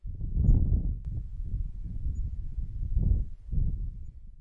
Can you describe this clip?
Quite realistic thunder sounds. I've recorded this by blowing into the microphone.
Lightning, Loud